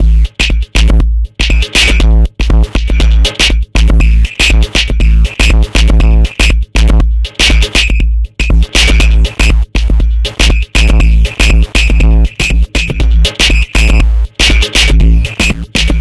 DestructoBreak3 LC 120bpm
breakbeat, distorted